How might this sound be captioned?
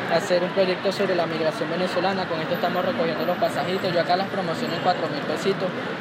Vendedor Venezonalo 3
Registro de paisaje sonoro para el proyecto SIAS UAN en la ciudad de Palmira.
Registro realizado como Toma No 05 Calle 30 Carreras 28 y 29.
Registro específico sobre voces (Vendedor Venezolano 3)
Registro realizado por Juan Carlos Floyd Llanos con un IPhone 6 entre las 11:30 am y 12:00 m el día 07 de marzo de 2.019
05, 28, 29, 3, 30, Carrera, entre, No, Of, Paisaje, Palmira, Proyect, SIAS, Sonoro, Sounds, Soundscape, Toma, Vendedor, Venezolano, Voces, y